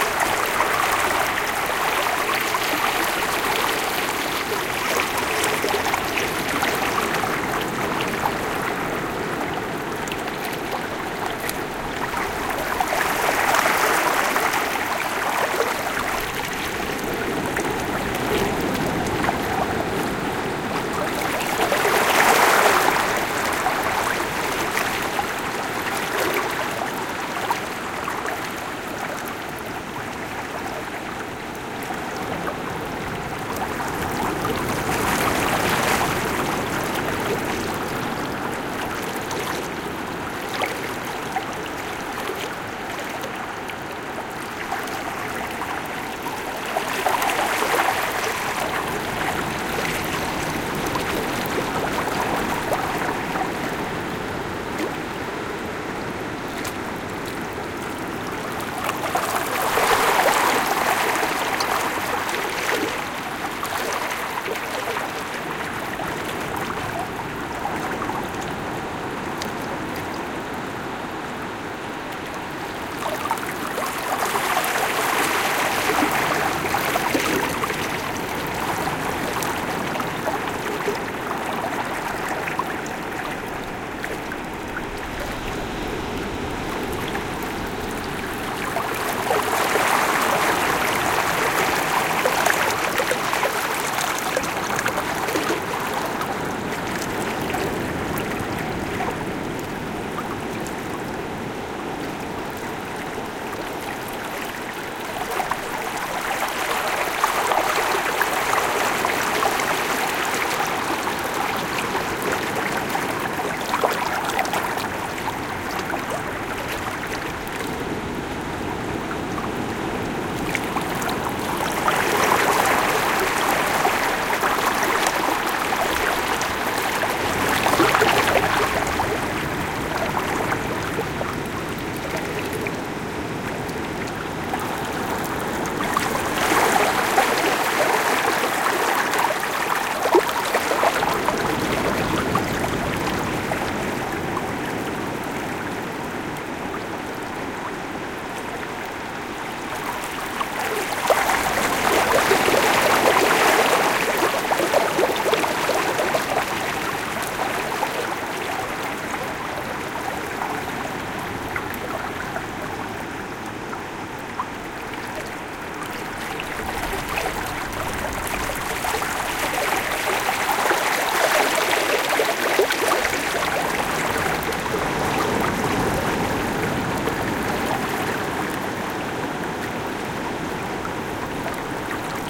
water reflow among rocks, rumble of ocean waves at some distance. Recorded at San Pedrito Beach (Todos Santos, Baja California S, Mexico). Soundman OKM mics, Olympus Ls10 recorder